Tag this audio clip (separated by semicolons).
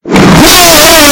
attack; horror; JackDalton; Scream; serious